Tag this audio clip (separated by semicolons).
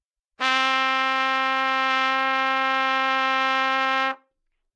C4; good-sounds; multisample; neumann-U87; single-note; trumpet